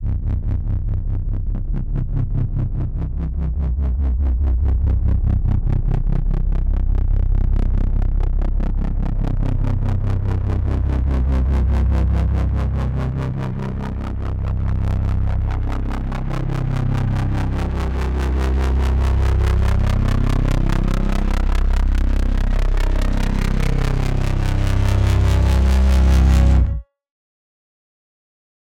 I made the heavy bass drone sounds (1-10) in one session. took me a while to tweak them. I used Serum as my main synth and a bunch of effects like distortion chorus and many more.